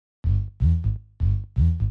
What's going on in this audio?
bass loop
125 beats per minute electronic bass pattern with pitch envelope.
notes, bass, electronic